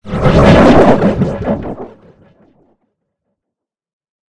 sinus reverb echo 2 reflect pitch up
Ideal for making house music
Created with audacity and a bunch of plugins
acid, fx, house, ping, quality